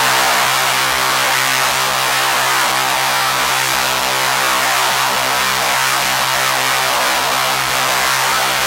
This is a lead synth sound I made for the XS24 on the Nord Modular G2 and Universal Audio UAD emulations of the Neve EQs, LN1176 Limiter, 88RS, Fairchild, and Pultec EQs. Also used the Joe Meek EQ from protools.
darkpsy, fm, g2, goa, lead, modular, nord, psytrance, synth